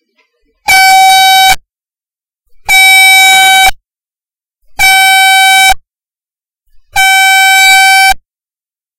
This was a home recording made with a rechargeable safety signal air horn. When the air supply in the canister is depleted, it is replenished by means of a small hand held pump.
siren, horn